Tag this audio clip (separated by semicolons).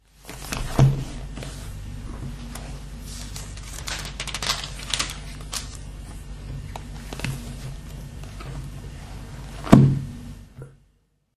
book; turning-pages; paper